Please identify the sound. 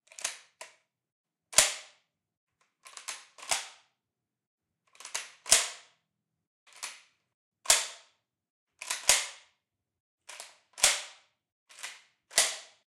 Shotgun Rifle Magazine Clip Movement
Auto, Automatic, Clip, Explosion, Gunshot, Magazine, Movement, Pistol, Rifle, Shotgun, army, gun, military, shoot, shot, war, warfare, weapon